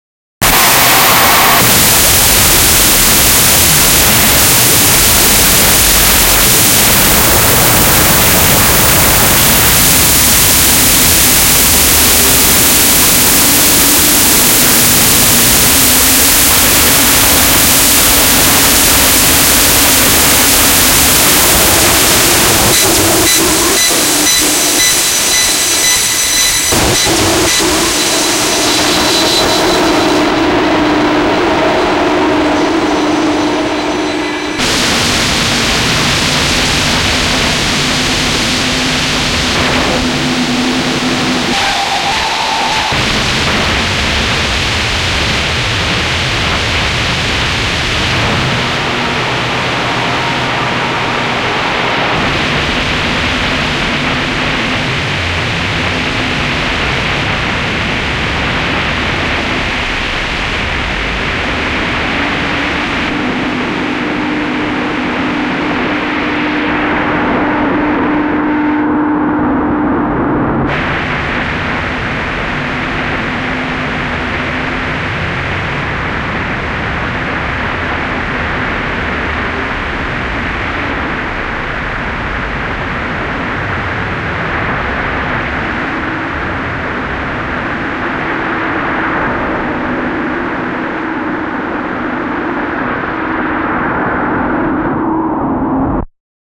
ELECTRONIC-NOISE-filtered-glitch-wall-of-sound05
While outputting a file of sound effects, I ended up with a severely corrupted file. Playback results include incessant shrieking and slight pitch alterations. To create more variety, I used a lowpass filter and long reverb.